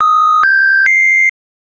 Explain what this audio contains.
siren-01-flat
When I made it that reminded me the sound when the line of a phone is busy or I'm not sure what is it, but you know what I mean... So it could be perfect for that.
If you want a real alarm I let you browse my Uploaded Sound.
This sound made with LMMS is good for short movies.
I hope you to enjoy this, if you need some variant I can make it for you, just ask me.
---------- TECHNICAL ----------
Vorbis comment COOL: This song has been made using Linux MultiMedia Studio
Common:
- Duration: 1 sec 718 ms
- MIME type: audio/vorbis
- Endianness: Little endian
Audio:
- Channel: stereo
alarm, busy, busy-phone, danger, e, emergency, flat, l, ligne-occup, occup, occupe, offline, phone, short, signal, siren, t, warning